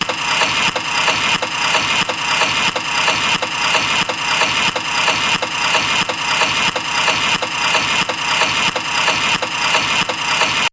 Rhythmic Clock Winding, Background Noise
Light background whirring of a grandfather clock, approx. 180 bpm. good for the background of a lofi song.
clock, gentle, lofi, noise, wind, winding